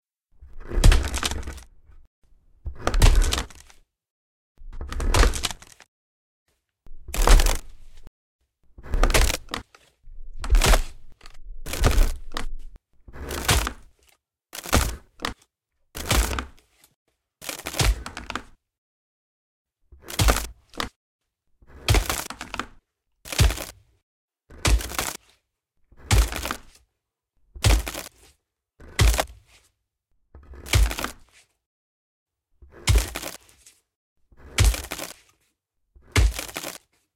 Monster footsteps on wood
A set of Monster footsteps i made for a podcast, when the monster steps down he breaks the wood underneath him. It sounds even better if you over lay this with monsters breathing
If you make anything with this please share :)
wooden, rip, monster, stomping, cracking, wood